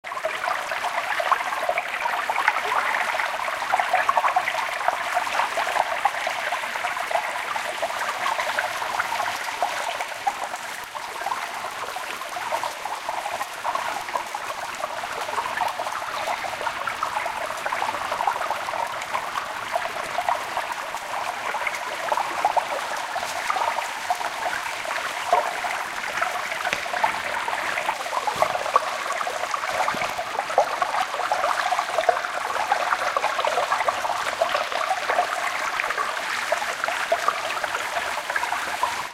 Water creek
Stereo recording of water running by in a creek. From the stereo microphone on the Nikon 1 while shooting video just above the surface.
slpash, slosh, water, bubble, trickle, stream, creek